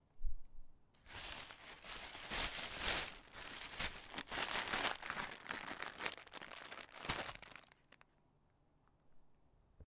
Plastic bag opened up and pack of cookies taken out
plastic bag opened up and a pack of cookies taken out
crackle, wrap, cookies